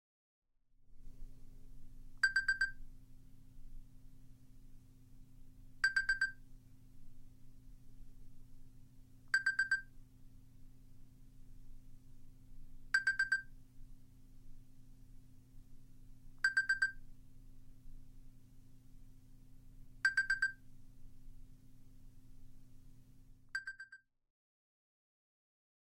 Cellphone alarm
alarm, cellphone